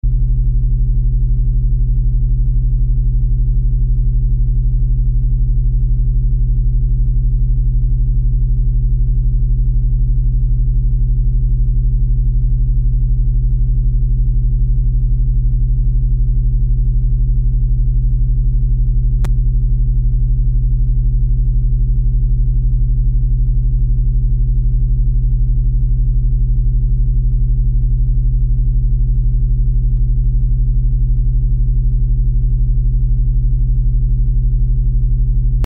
Sc-Fi ship background sound
Background hum for a sci-fi space ship.
This sound was created using a Kaos pad and an 8 track recorder, filtered through various effects.
background, control, fiction, hum, room, science, Sci-Fi, ship